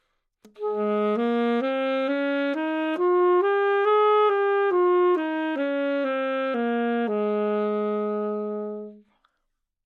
Sax Alto - G# Major
Part of the Good-sounds dataset of monophonic instrumental sounds.
instrument::sax_alto
note::G#
good-sounds-id::6839
mode::major
good-sounds, sax, scale, neumann-U87, alto, GsharpMajor